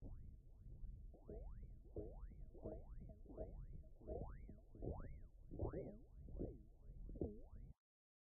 Original sound was me drinking milk. Edited in Audition. Recorded on my iPhone8.